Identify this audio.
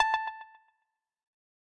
Simple free sound effects for your game!
blip, effect, game, sfx, sound, sound-effect, videogame